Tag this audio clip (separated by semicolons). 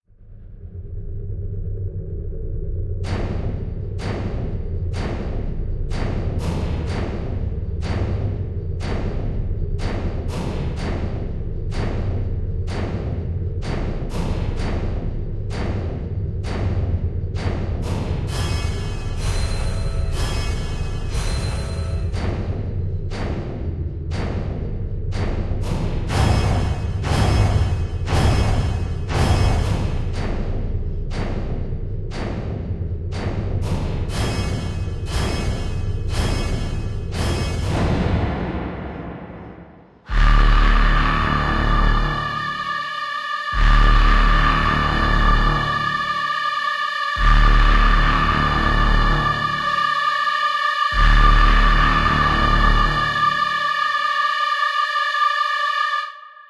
horror; fearful; God; evil; Impending-doom; devil; demon; scary; sinister; phantom; Sacrifice; hell; nightmare; fear; Thriller; Halloween; haunted